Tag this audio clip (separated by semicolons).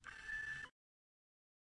energy; pick-up; power-up